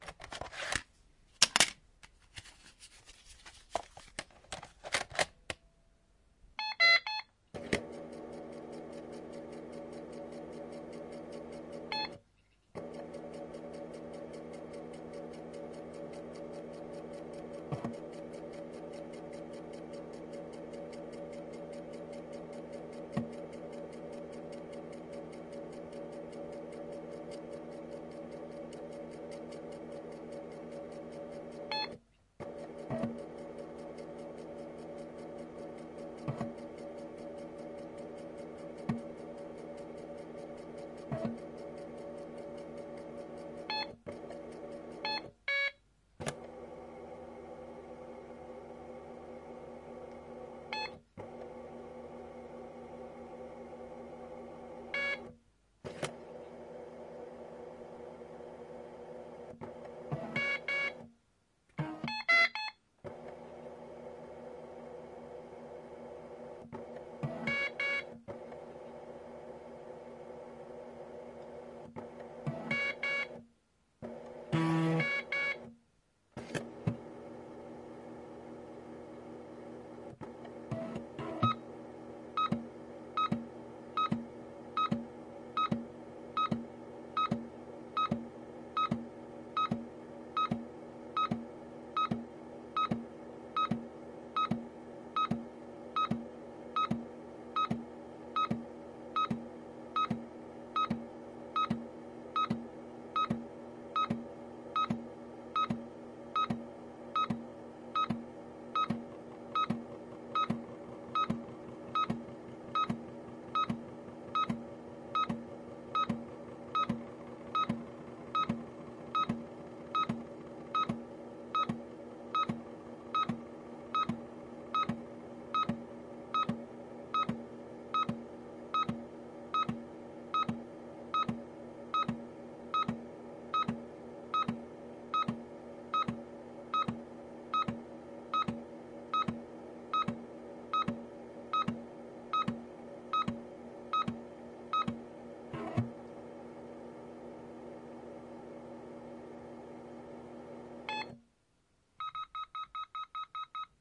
Braille'n speak is a notetaker for blind people with braille input and speech output. It was supplied with disk accessory so user can store and load data from standard 3.5 inch disk. I found some disk so I tried to store something on it and load it back, protect the disk and format it (to produce an error sound) and format it without protection. I also recorded the beeping when the battery of the accessory is low. The development of this product was discontinued. Recorded with Zoom H1.
35, accessory, beep, braillen-speak, data, disk, format, history, notetaker, storage